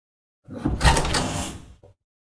this door touches the marble floor while opening and it produces an interesting sound. reminds me of the sound of an automatic door opening or a door from a spaceship. recorded using zoom h4n